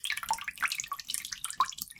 Small Pour 005
aqua, aquatic, bloop, blop, crash, Drip, Dripping, Game, Lake, marine, Movie, pour, pouring, River, Run, Running, Sea, Slap, Splash, Water, wave, Wet